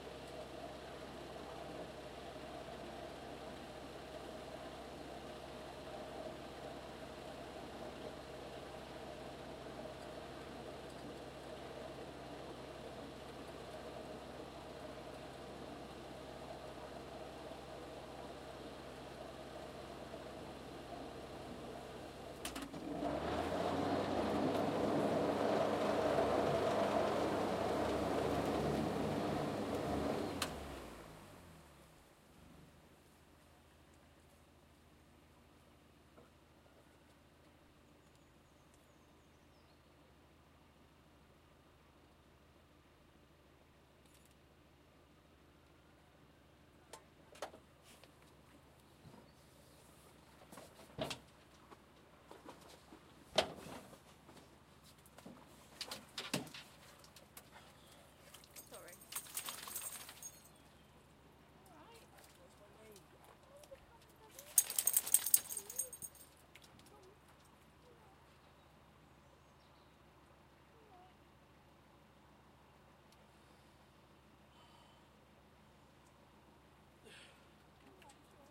Narrowboat - Output - Stereo Out
Narrowboating on the Kennet and Avon Canal near Reading. Long section of engine at steady rate, then hard reverse and stop. Sound of dog being pulled from river before shaking off.
boat, canal, engine, field-recording, narrowboat, river, water, waterway